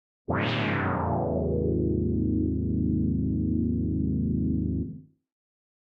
A synthesized wow sound - with filter and sweep processing.